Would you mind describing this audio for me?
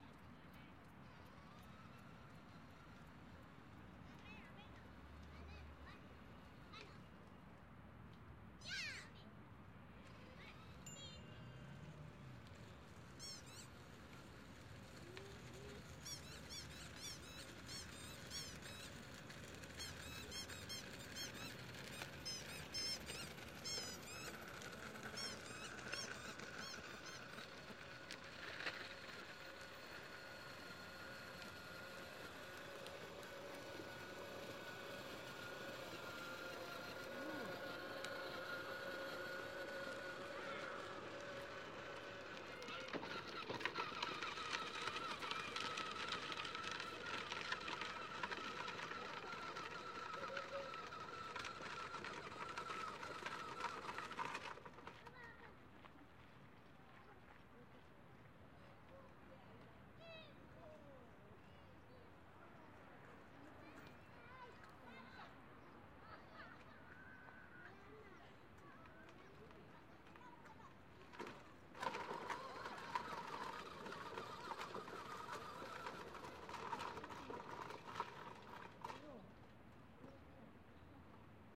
160718 HSN boy on tricycle passing by
Spanish Salamanca in the early evening. City-sounds in the background. Family with children passes by, one of them rides an electric tricycles. It gets stucked in a pothole, the boy gets it out.